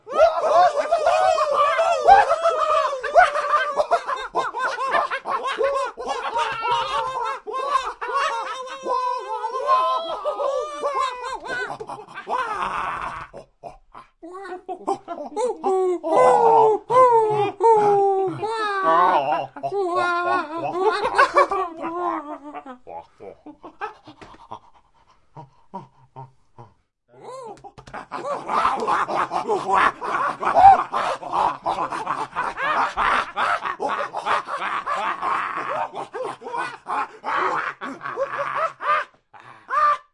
Group of monkeys screaming, being victorious, happy, fearful, sad.
animal, ape, cartoon, chant, character, english, game, game-voice, group, language, monkey, sad, scream, speak, victory, vocal, voice